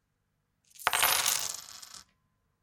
Coin Drop
Close mic recording of multiple coins dropping onto a desk or a hard surface. Recorded with Shure SM57.
cash, clang, close-mic, Coins, drop, hard-surface, metal, money